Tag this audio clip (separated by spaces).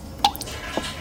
drip running shower toilet water